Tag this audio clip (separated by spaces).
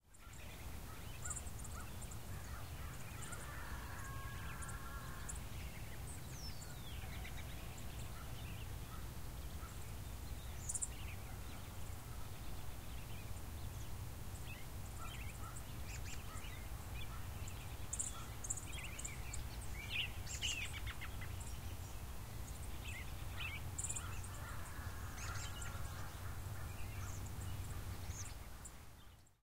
outdoors field-recording bird nature ambient birds ambiance chirping atmosphere ambience birds-chirping